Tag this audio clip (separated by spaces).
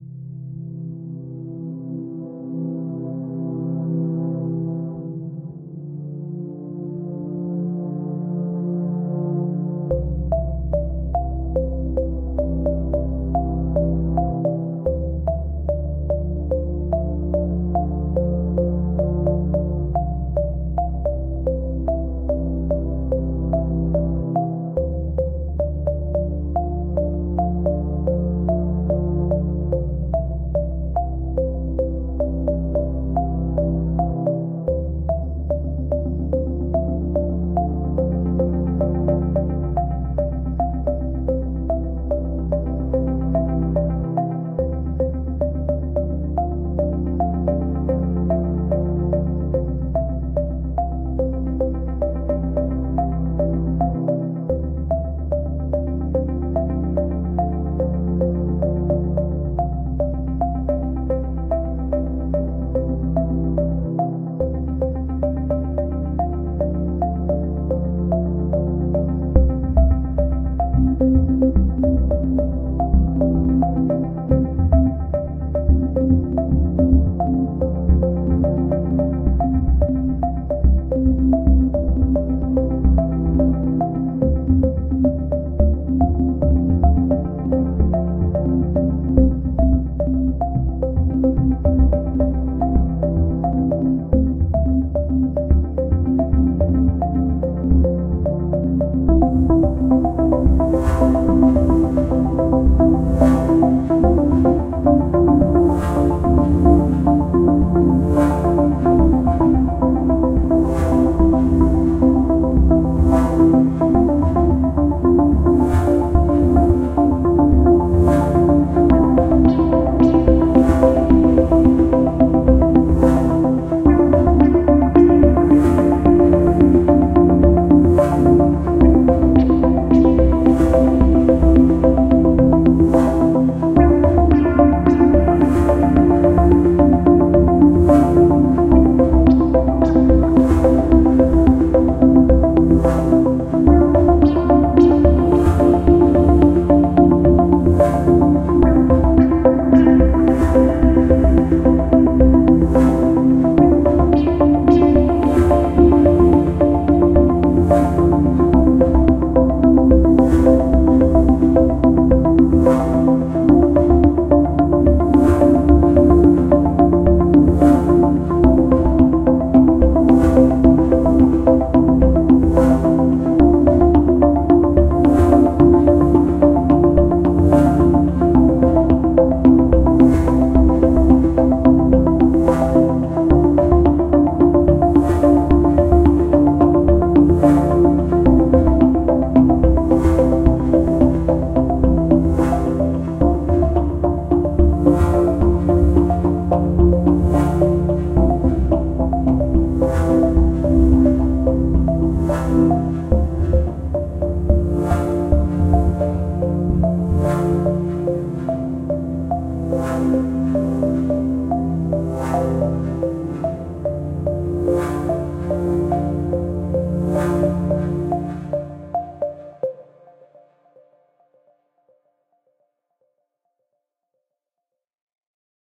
emotional chill